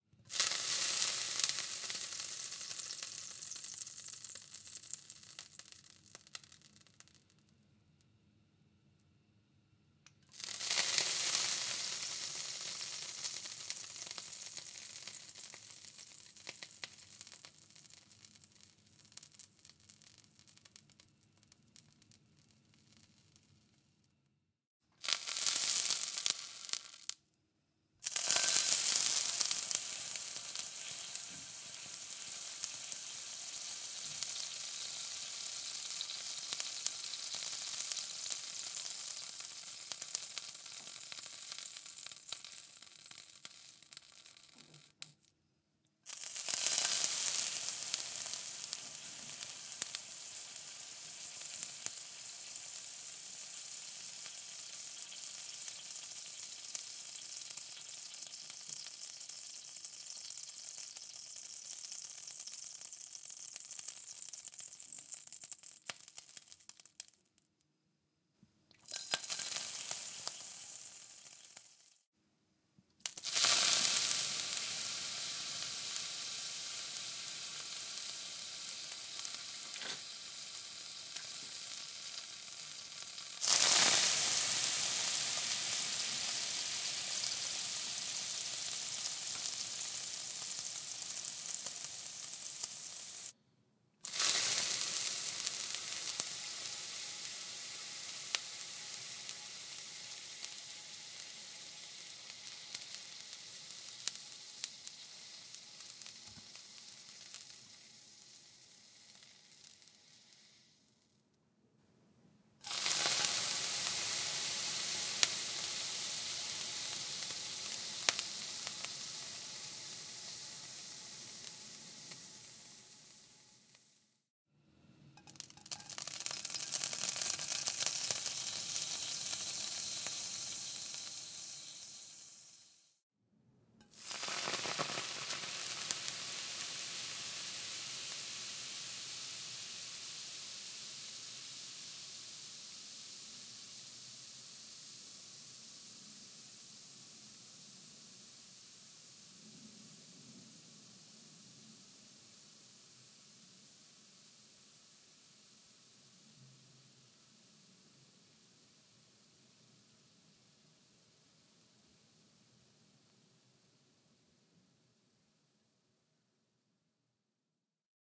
Sizzle / Acid Burn
A compliation of mono recordings of a pipette of water being squirted onto a hot frying pan.
Various intensities.
Recorded for use as flesh-burning sound in short film.
Rode NTG2 > FEL 3.5 - DX Stereo preamp > Edirol R-09
hiss, acid, hot, burn, scorch, fizz, water, bubbling